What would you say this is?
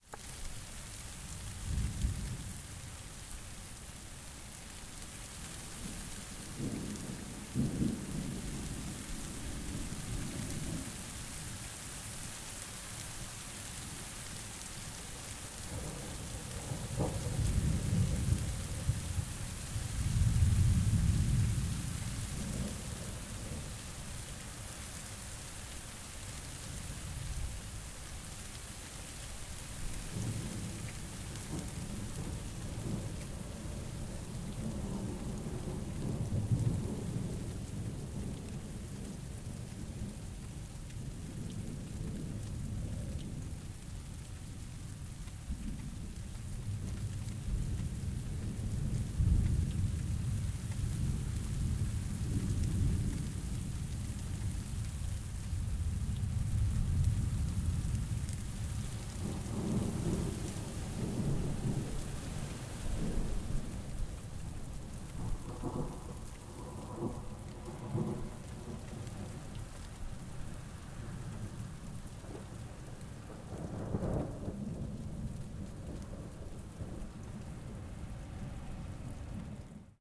A recording that contains environmental sounds in Ayvalık, Turkey while there was a moderate rain with thunders in distance.